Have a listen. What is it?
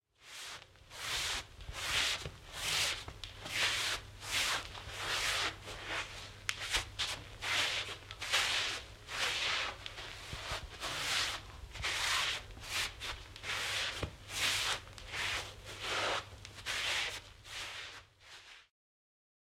12-Sliding walk carpet
Sliding walk on carpet
carpet, CZ, Czech, footsteps, Pansk, Panska, sliding, steps, walk, walking